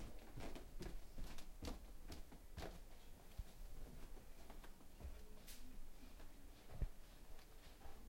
walking downstairs 1-2
Creaky staircase in my house being descended. Recorded with a Roland Edirol internal microphone with the high-gain on.
downstairs, walking